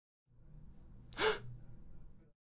17-sorpresa2 chica
A female surprise sound
female, girl, sound, surprise